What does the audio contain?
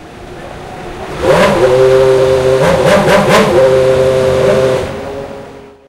F1 BR 06 Engine Starts 4
Formula1 Brazil 2006 race. engine starts "MD MZR50" "Mic ECM907"